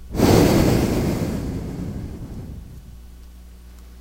Sound of a human exhaling deeply.